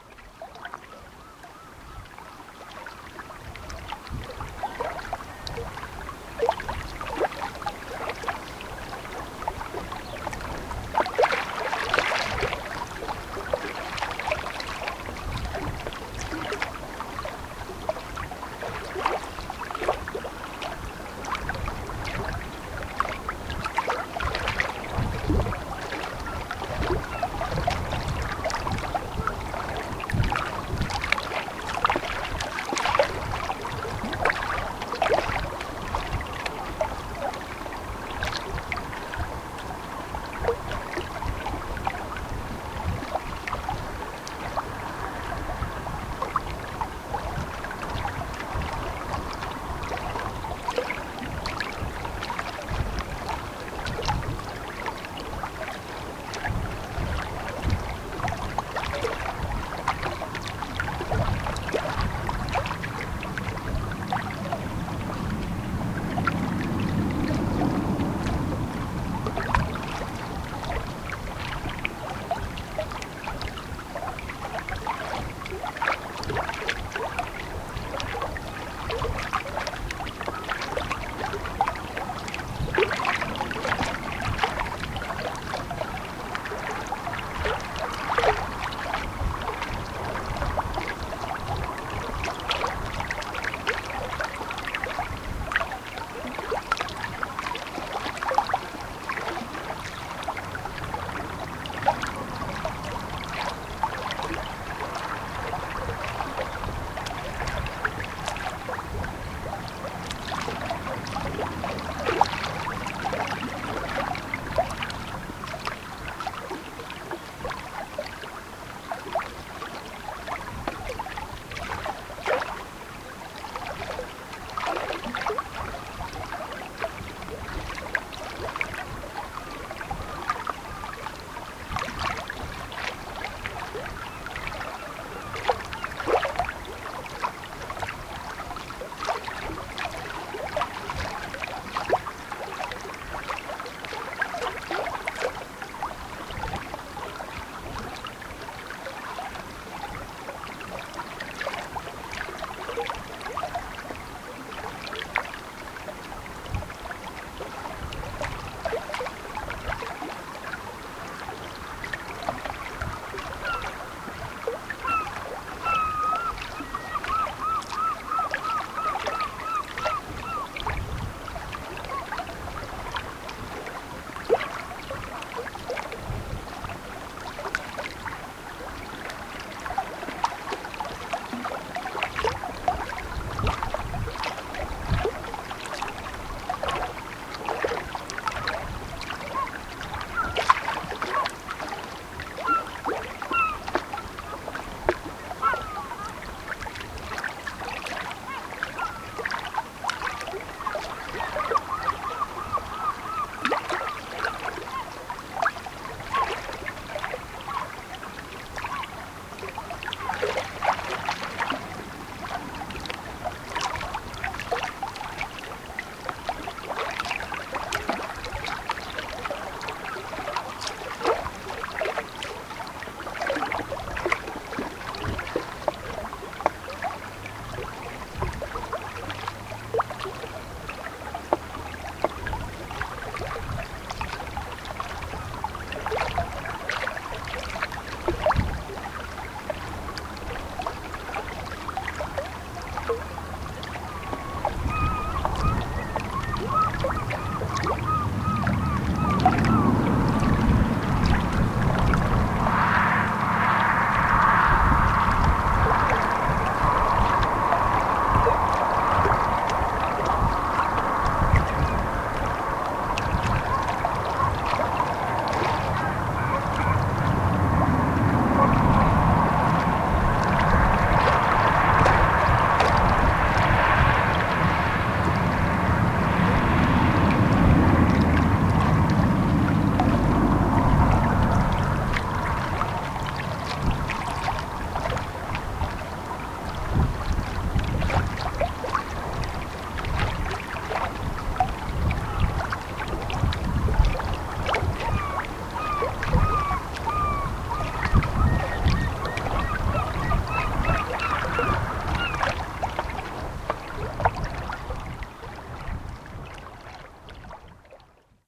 08.Mealt-Loch
Calm atmospheric recording on the banks of Loch Mealt. Gentle waves splashing on the rocks, breeze, passing cars, seagulls.
lake, loch, splashing, water, waves